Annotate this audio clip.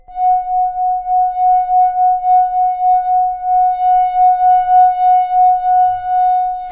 Making a wine glass sing with a wet finger. The pitch is determined by the amount of liquid in the glass.